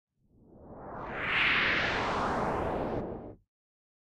a user interface sound for a game
click, videogam, swish, game, swoosh, woosh, user-interface